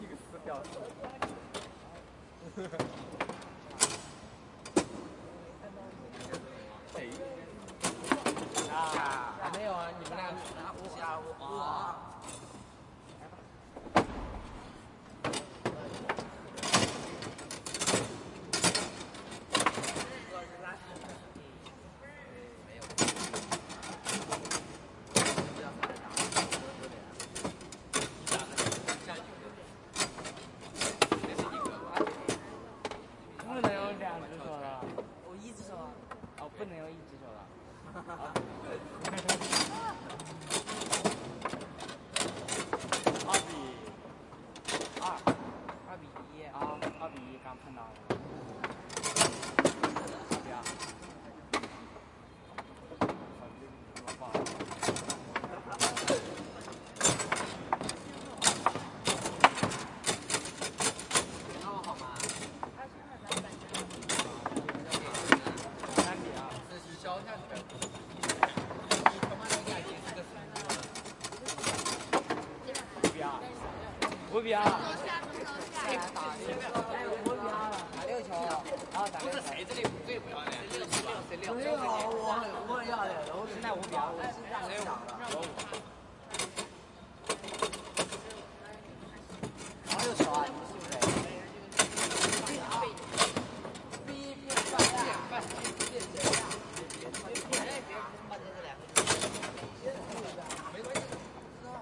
Ambiente de jogo de pebolim no Colégio São Bento, take 02
Ambiente de pebolim em uma das quadras cobertas do Colégio São Bento, São Paulo, take 02, canais 03 e 04.
colegio, colegiosaobento, esportiva, jogo, pebolim, quadra, quadraesportiva, saobento